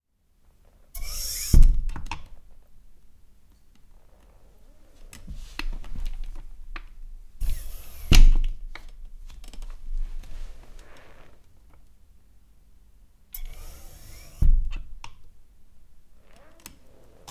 Hydraulic, Office Chair
Sound of office chair hydraulic system. Had the idea of using this for Iron Man suit effect and recorded with Shure pg27